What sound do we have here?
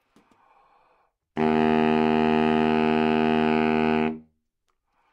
Sax Baritone - E3
Part of the Good-sounds dataset of monophonic instrumental sounds.
instrument::sax_baritone
note::E
octave::3
midi note::40
good-sounds-id::5311
baritone, E3, good-sounds, multisample, neumann-U87, sax, single-note